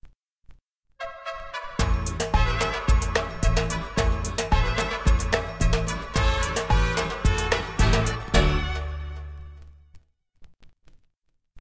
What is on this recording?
Sample de sonido ranchera mexicana